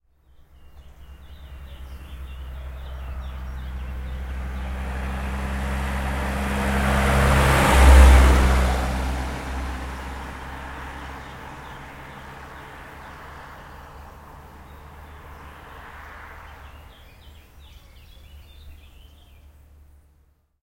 Car pass by R-L | Birds Ambience
Car passing by on a quiet road in the countryside. Some easy birds.
Birds, Car, Country, Countryside, Quiet, Road